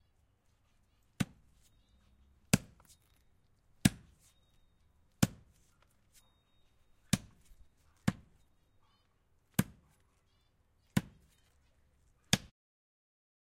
axe on wood
This is a recording of an axe cutting on wood.
recording device: ZoomH6
forest, nature, wood